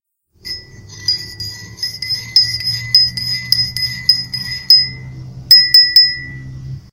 Este sonido lo grabé para colocarlo en un video que hice como introducción a un podcast que estoy haciendo... Después de grabarlo lo ecualicé en Audacity
"I recorded this sound to put it in a video that I made as an introduction to a podcast that I'm doing ... After recording it, I equalized it in Audacity"